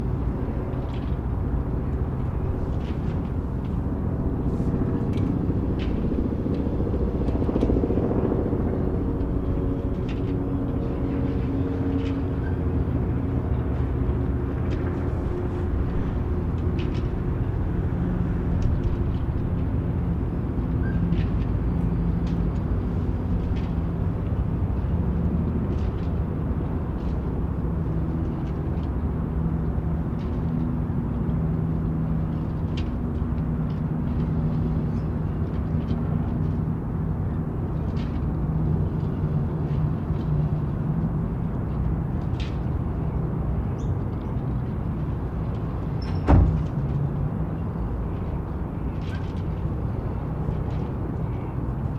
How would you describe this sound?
Some random atmos taken at an apartment on the 8th floor.
The windows were open, so you can hear the blinds, wind, cars, helicopters and boats. etc.